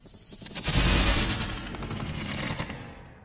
ZOOM0001 XY Edit
Sound of some type of large machinery powering down
classwork, field-recording, sound